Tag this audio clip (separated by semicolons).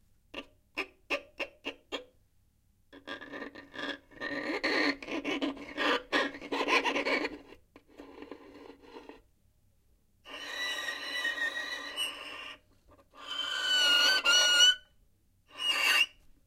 bow; strings; musical-instruments; violin